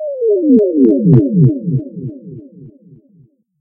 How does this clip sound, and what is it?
Created using audacity, a teleport style effect, pitch shifting lower with a tremelo, and a delay/reverb applied.
Using in an application during processing, while loading bar is building
synthesized, game